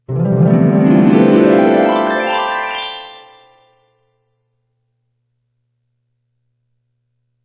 harpsicord dream-enter2
Harpsichord sound used when a kid's story enters a dream or imaginary sequence. Roland XP-10 keyboard with two-hands gliding up the board.
harp, dream, 252basics, imagine, harpsicord, glissando, flashback